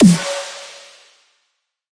Xtrullor Snare 03 [C#]
A free snare I made for free use. Have fun!